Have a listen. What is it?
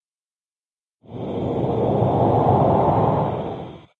STM3 growl loop short
Reversed, shortened and further processed version of growl_loop. An quick and slight 'voice' sound at the end. Sounds like a zombie struggling to breathe.
distortion, static, growl, demonic, drone